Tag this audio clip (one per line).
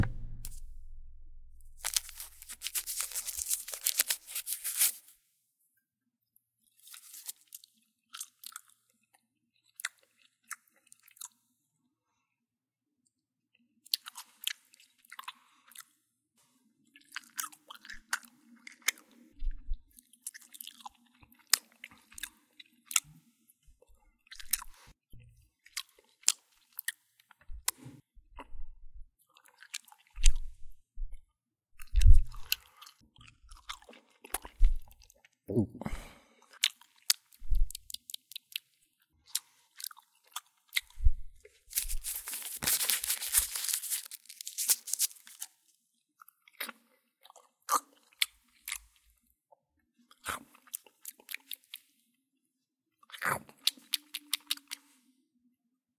unwrap
wrapper
gum
chew
chewing